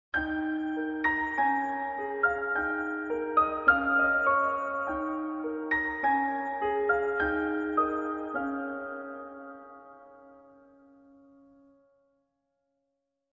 Little slow dance.
dance, puppet, ballet